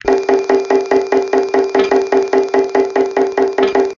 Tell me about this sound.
beat with kaoos